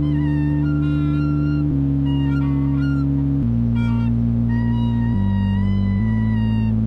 Paterne Austère
strange clarinet mixed with bass
clarinette, bass